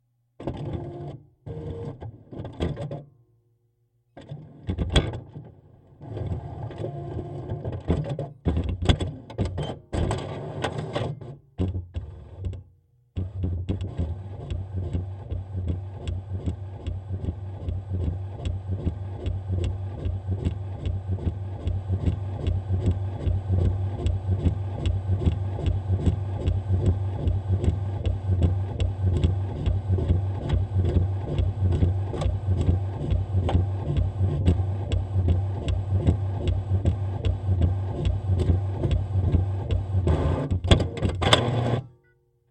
Contact mic on a printer. Printing a test page.